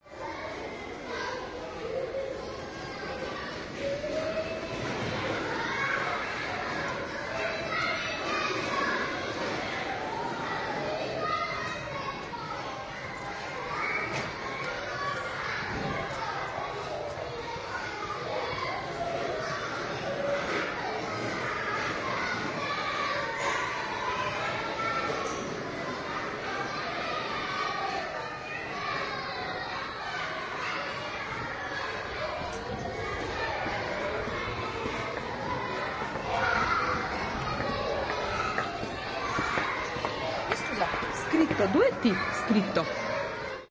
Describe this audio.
20080303 Venice next to school
This is a field recording of a schoolyard behind a wall. After a while an Italian woman asks how a certain word should be spelled for her text-message : )
binaural, children, field, schoolyard